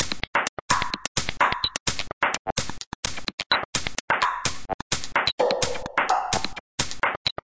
minimal glitchy loop nokick 128bpm
128bpm minimal/microhouse glitchy drumloop
128bpm, drum, drumloop, drums, electro, glitch, grain, grains, house, idm, loop, microhouse, minimal, percussion, techno